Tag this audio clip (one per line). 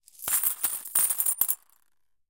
currency
paper-bowl
metal
percussive
jingle
coins
paper
bowl
metallic
percussion
money